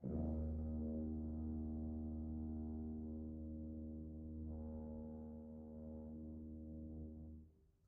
One-shot from Versilian Studios Chamber Orchestra 2: Community Edition sampling project.
Instrument family: Brass
Instrument: Tuba
Articulation: sustain
Note: D#2
Midi note: 39
Midi velocity (center): 20
Room type: Large Auditorium
Microphone: 2x Rode NT1-A spaced pair, mixed close mics
sustain, multisample, vsco-2